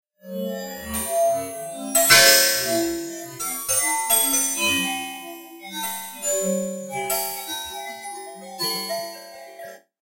Granulated and comb filtered metallic hit